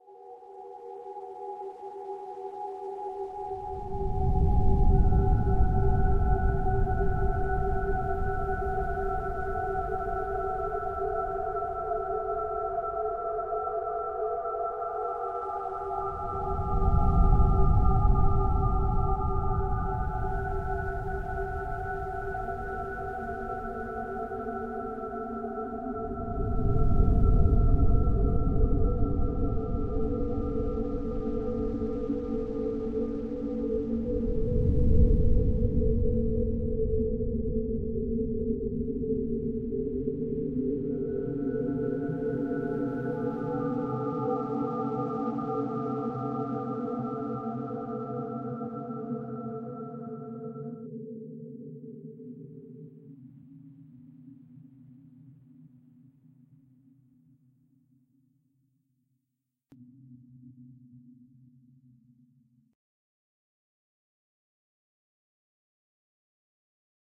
Continuous fluffy light sound with soft low booms.
Generated and Edited in Audacity.
stars, open, atmosphere, cloudy, ambience, nebula, echoes, booms, forgotten, beholding, dust, cinematic, vast, calm, ambiance, relaxing, fluffy, ambient, echo, amazing, holy, dark, calming, outerspace, soft, space